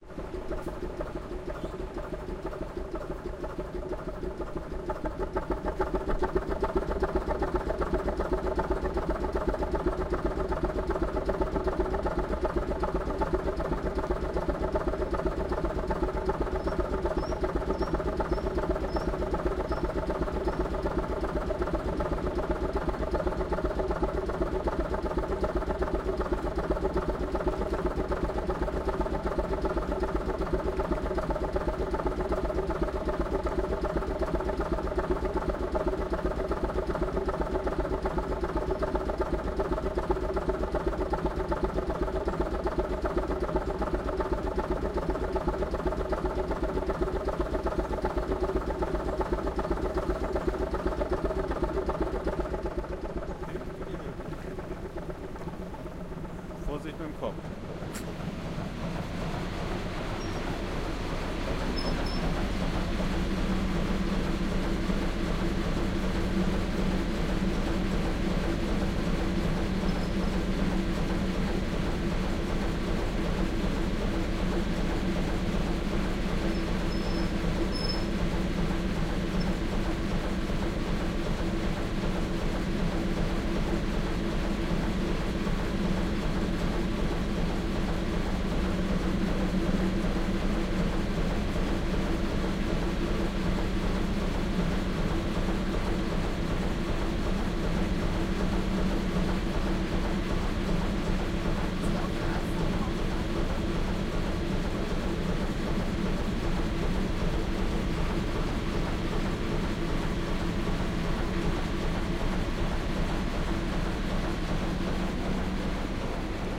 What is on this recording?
Alster Ship
a good old Diesel engine of a good old Alster motor ship
motor, engine